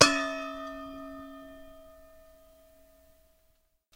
PliersPlasticHandleBottom1-SM58-8inAway
Struck from the bottom again whilst hanging vertically from 1 wire, the 11 1/2" bowl were struck on the bottom with the plastic insulated handle of the needlenose pliers. Again, the Shure SM58 mic was held approximately 8" away from the interior of the bowl.
PlasticHandle, SM58